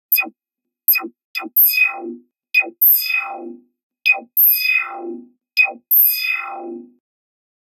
Glitch sweep tonal hoover stop 1(mltprcssng)
The artificially generated sound fx. Can be used as part of a larger sound design. Enjoy it. If it does not bother you, share links to your work where this sound was used.
Note: audio quality is always better when downloaded.
film, digital, blip, processing, sfx, bleep, option, beep, game, glitch, signal, sound, UI, effect, GUI, fx, screen, sound-design, computer, telemetry, command, boot-up, sci-fi, gadjet, start-up, confirm, calculate, interface, select, cinematic